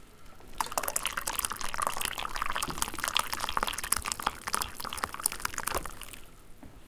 Pouring a cup of coffee
pour, Kaffe, drinks, liquid, water, cup, fill, coffee, tea, beverage, drink, Hot, glass, pouring, kitchen